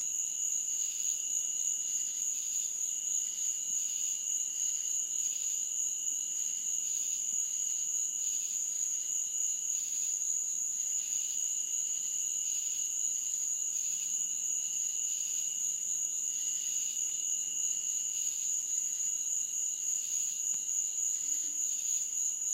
Cicada Insects 8 25 13 10 06 PM 1
Short field recordings made with my iPhone in August 2013 while visiting family on one of the many small residential islands located in Beaufort, South Carolina (of Forrest Gump, The Prince of Tides, The Big Chill, and The Great Santini fame for any movie buffs out there).
forest, summer, semi-tropical, low-country, night, crickets, birds, day, jungle, insects, frogs, USA, insect, field-recording, Beaufort, humid, South-Carolina, nature, hot, tropical, cicadas